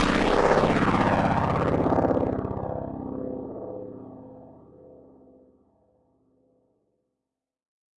This sound was created using ZynAddSubFX software synthesizer.
Basically it's a distored 'pluged string' sound.
I used the integrated wave recording to sample the notes.
multisample, synthesizer, zynaddsubfx